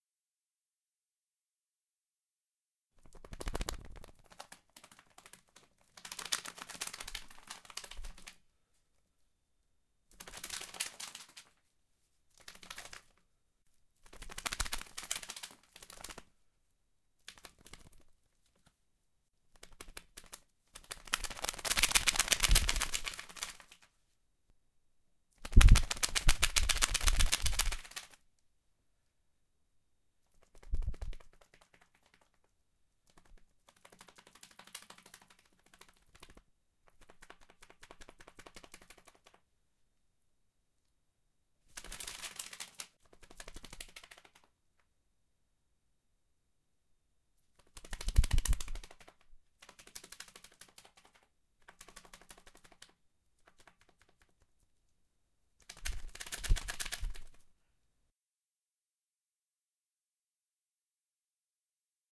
RG Birds Fly
Birds flapping wings, taking flight. Artificial.